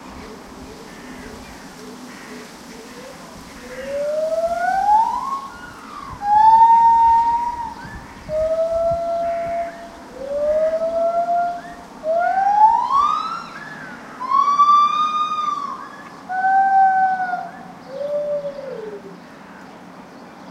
lar gibbon01
A female Lar Gibbon calling. Recorded with a Zoom H2.
gibbon, field-recording, primates